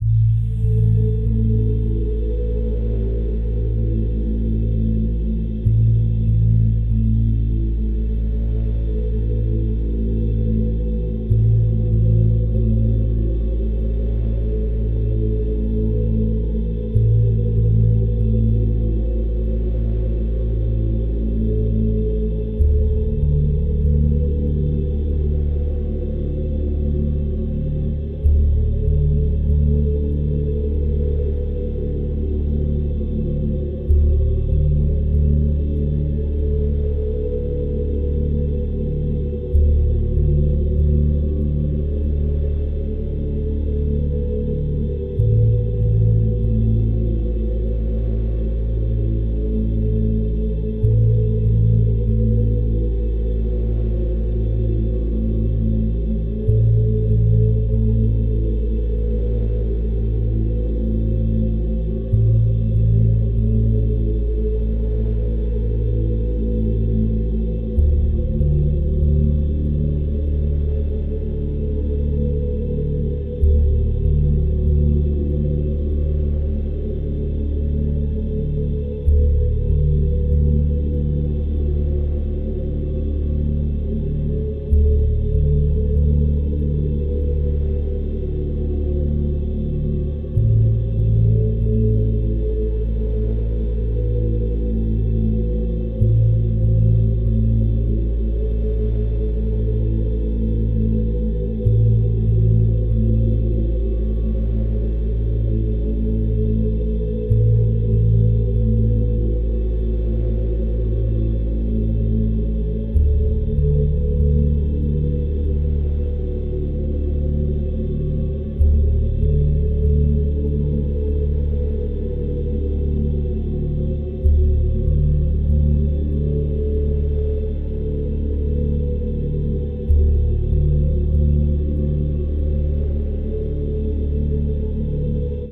Dark Cave Background 2
A second dark ambient background sfx sound creating a mysterious feeling for your game. Perfect for cave, dungeon, horror, creepy, etc.
Looping seamless.